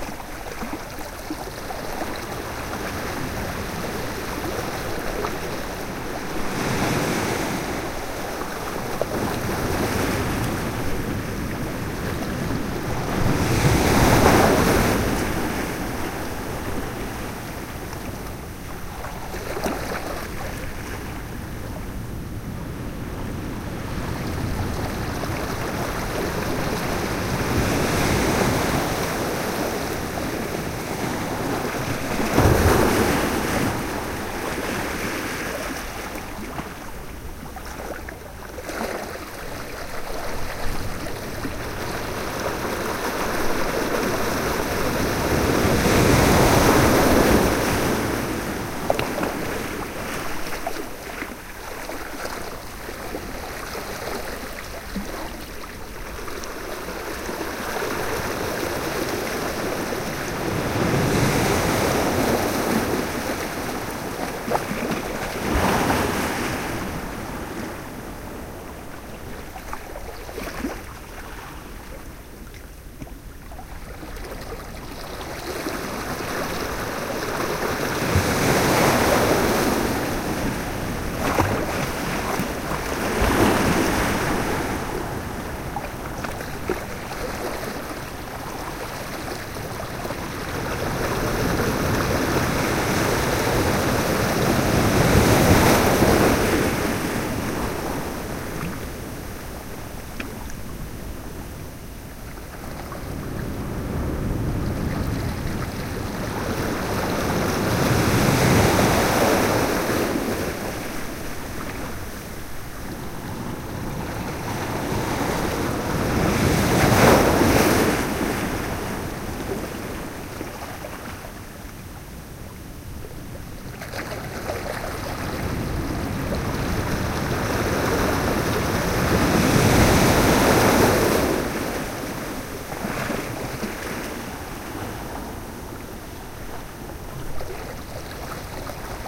Ocean waves at Point Reyes. Edited as a loop. Using a Sony MZ-RH1 Minidisc recorder with unmodified Panasonic WM-61 electret condenser microphone capsules.
oceanwaves-9&10 are from different parts of the same recording and edited to be combined and looped.
ocean, sea, slosh, waves, splash, close, stereo, Point-Reyes, beach, water, loop, wet, field-recording, seashore